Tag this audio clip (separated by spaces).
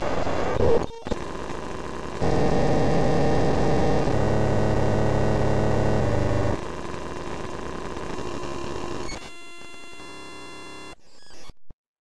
abstract broken digital electric freaky glitch machine mechanical noise sound-design